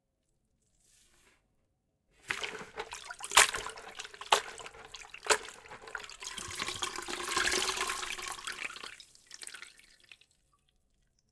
dipping a rag
housework, house